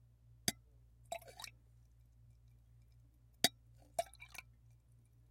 Glass Hit Then Pour Shot x2 FF320
Glass being hit followed immediately by a shot being poured, twice. Quick pour, medium to high pitch hit on glass.
Glass, Pouring-liquid